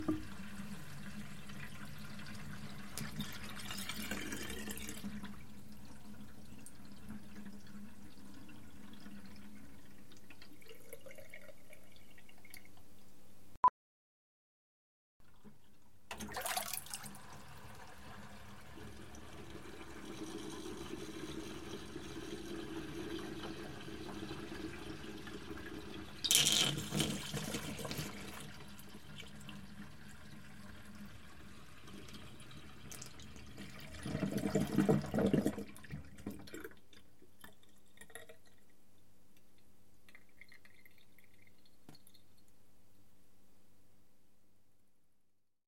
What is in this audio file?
Empty sink
water, catering, empty, field-recording, sink, stereo, kitchen